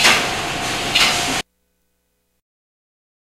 Another machine sound.